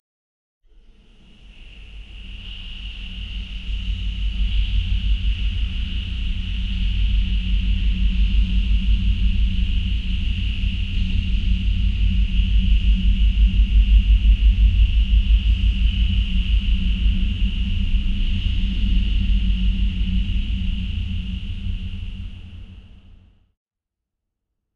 Submarine Passing 1
Heavily processed VST synth sounds using various filters, delays, flangers and reverb.
VST, Underwater, Filter, Submarine, Reverb, Propeller